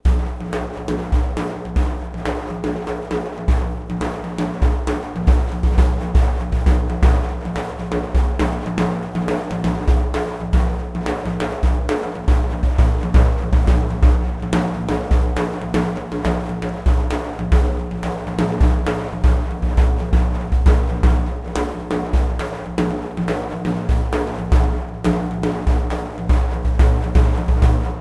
7/8 fast daf rythm with rode NT4 mic, presonus preamp